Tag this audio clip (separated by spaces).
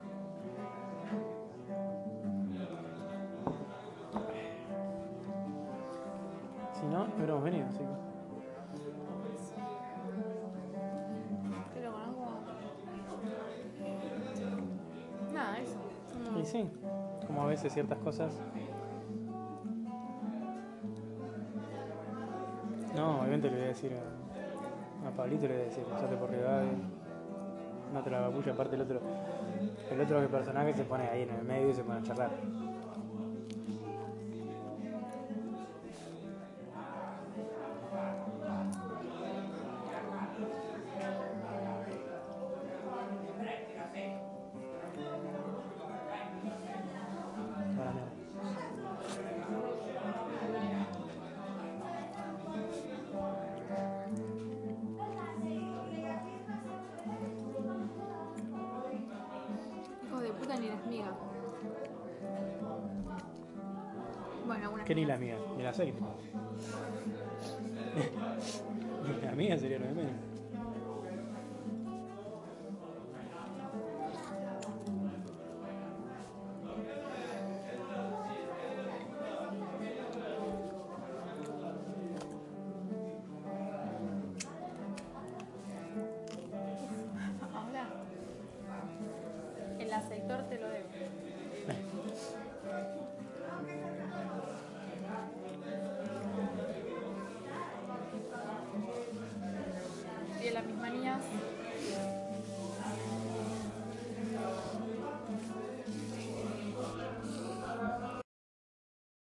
field-recording galicia guitar music night spain taberna tavern voices